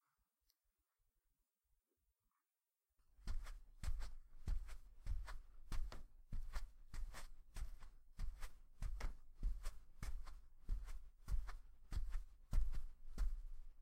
Walking in slippers on carpet.
shoes, steps, walking, carpet, step, slippers, footstep, walk, footsteps, shoe